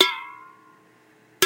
Flicking aluminum can with finger and resonation
Aluminum, Can, Ding, Ring, Strike